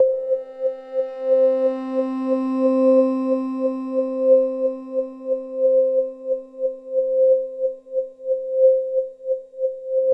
C4 This is a multisample of a wobbly sweeping patch i made with my SY35 a few years back. Interesting rhythmic textures are created when several notes are held together
pad, sweep, synth, texture, warm